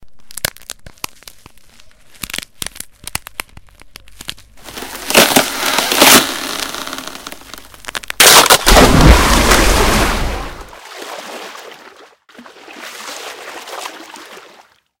ice Crack
I created this for a homeschool drama presentation of Little women. this is supposedly for someone falling in to a lake and splashing around and getting out